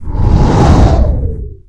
incoming, mortar, whistle, artillery, grenade